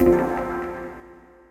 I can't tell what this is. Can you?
this is a new series these are made from sampling my acoustic guitar and processing everything in renoise multiple resampling and layerings
futuristic, click, sound-design, sfx, design, gui, positive, success, menu, up, elements, effect, interface, ui, sci-fi, button, future, sound, game